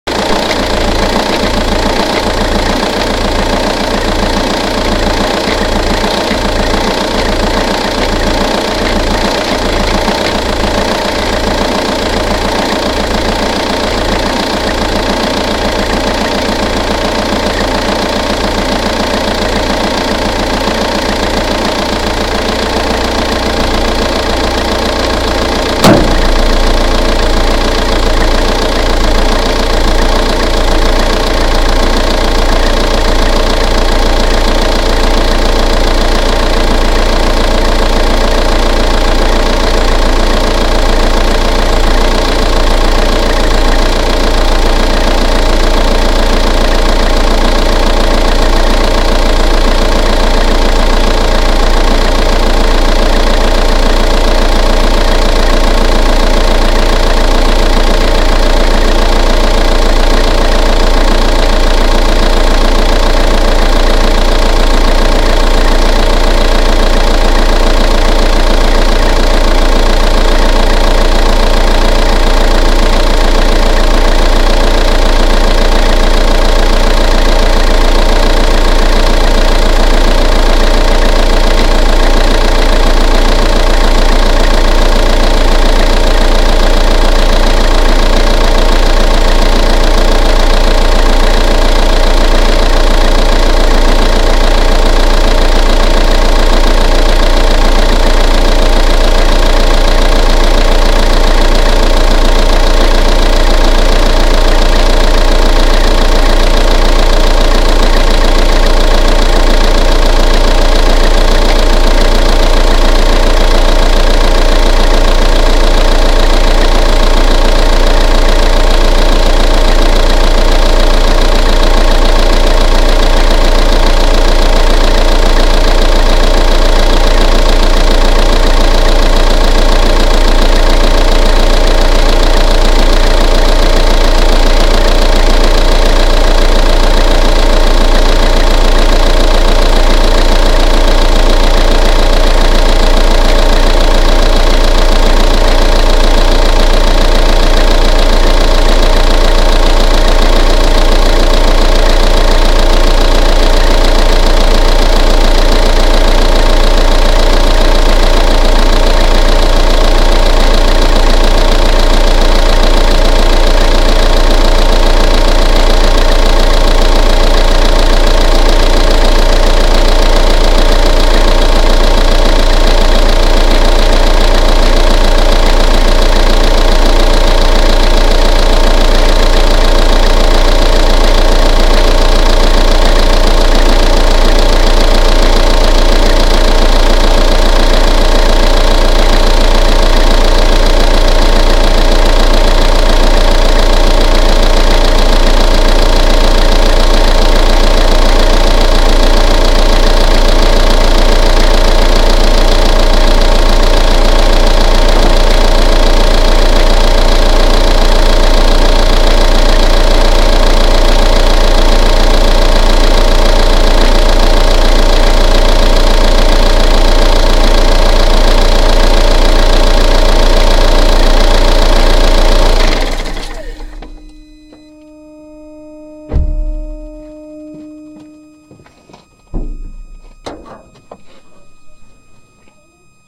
car engine under bonnet
diesel car engine ticking over, recorded from under bonnet
car, diesel, engine, idle, idling, motor, ticking-over, vehicle